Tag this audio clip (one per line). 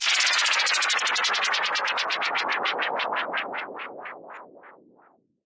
fx
sci-fi
slowdown
slowing